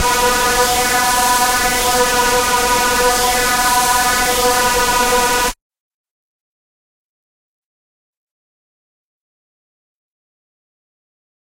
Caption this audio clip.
multisampled Reese made with Massive+Cyanphase Vdist+various other stuff
distorted, hard, processed, reese